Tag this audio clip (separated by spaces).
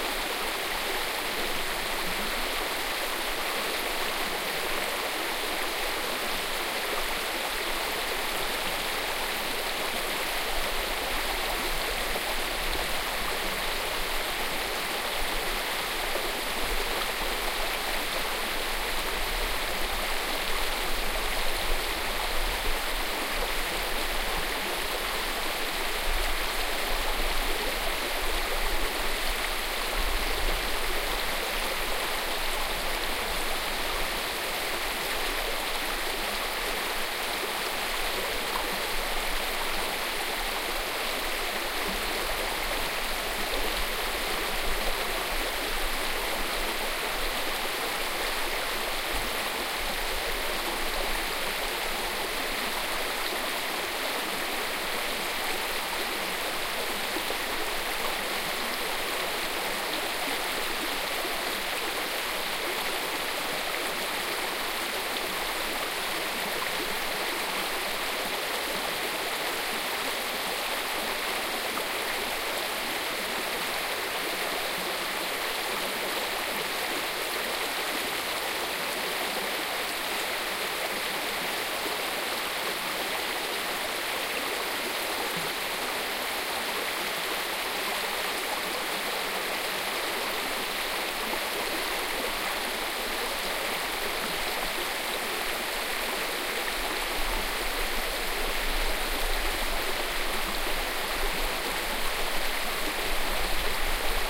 mountainstream mountains stream field-recording river harz binaural forest